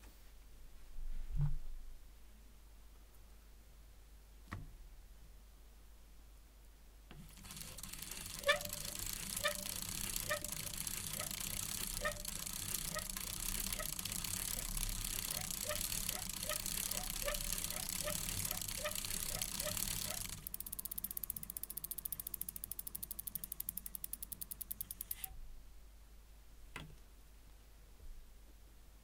Foley Bike 2
a bicycle i recorded